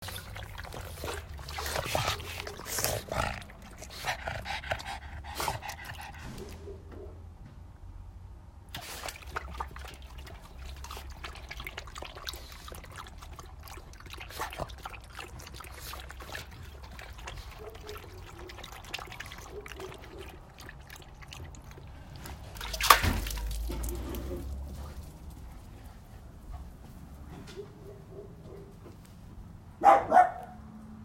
Dogs Drinking Water
My pug and terrier drinking water after a long walk. Recorded with iPhone 8.
dog, drink, drinking, gulp, water